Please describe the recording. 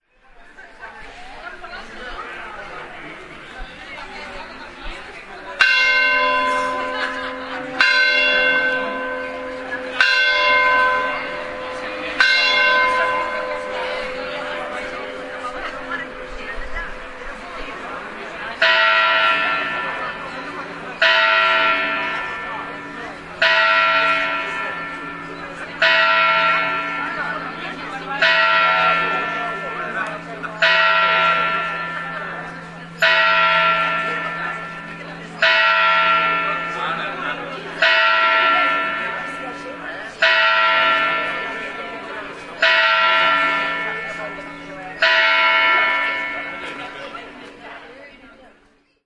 Campanes Immaculada
This sound was recorded with an Olympus WS-550M and it's the sound of the Immaculada's bells ringing at twelve o'clock, which is located next to the Ramon Muntaner high school.